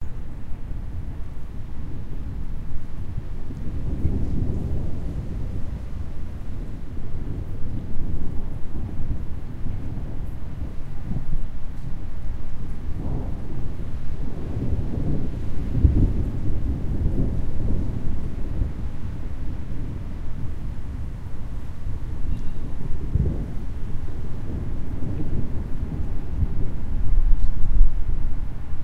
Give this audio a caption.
thunder growling 2
Rolling thunder with a bit rain recorded with a Zoom H1 XY-microphone.
But you don't have to.
Wanna see my works?
thunder, thunder-storm, growling, thunderstorm, rumble, rolling-thunder, weather